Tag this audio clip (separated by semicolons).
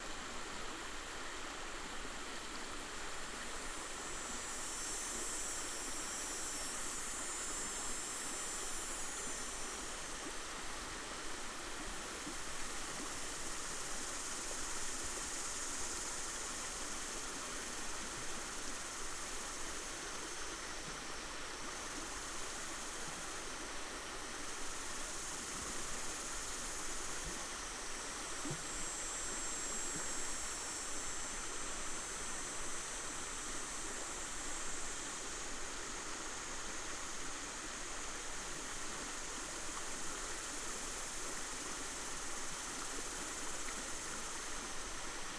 crickets; chirps; creek; water; field-recording; hissing; fields; natural; field; bugs; hiss; stream; clean; nature; gushing; outdoors; river; loop; birds; insects; flowing; cricket; chirping; chirp